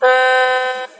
toy saxophone (4)
Sound of a toy which i recorded in a toyshop using the mic on my phone. Chopped, cleaned and normalized in Adobe Audition.